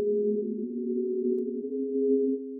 ROMAGNOLI Marine 2016 2017 hypotheticalstarship
The idea is to give the impression of a strange object movement, like a starship. The sound was made from a tone DFTM to which I applied a reversal of direction, a reverberation , while changing the speed and height (working on the bass and treble)
Selon Schaeffer:
N continu tonique
Masse: son seul tonique
Allure: vivante
Timbre: Brillant
Grain: légèrement granuleux
Dynamique: attaque douce et lente
Profil mélodique: Serpentine
Profil de masse: Calibre
science-fiction, strange, atmosphere